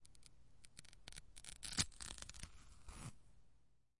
banana - peel
Peeling the first segment of a banana.
banana, banana-peel, food, fruit, peel, peeled, peeling